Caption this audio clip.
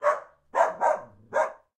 Animal Dog Bark 21
Animal Dog Bark